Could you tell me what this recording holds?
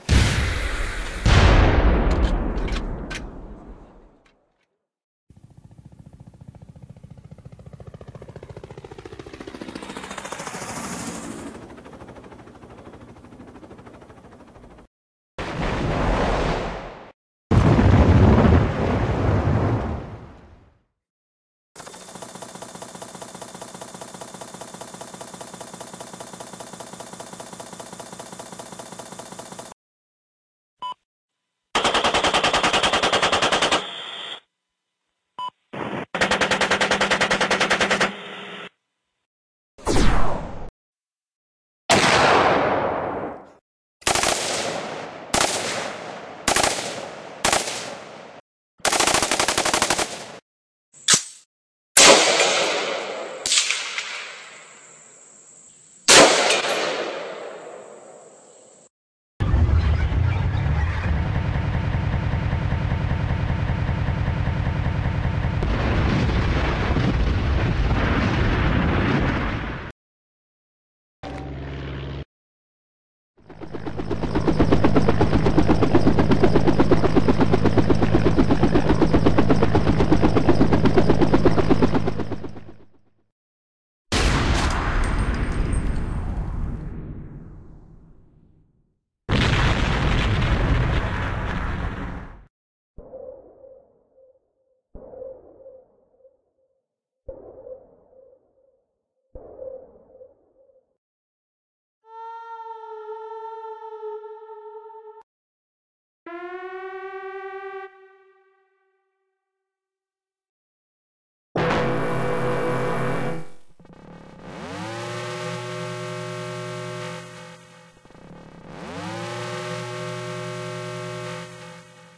Sounds of war 01.
Explosions, shots and more sound Fx.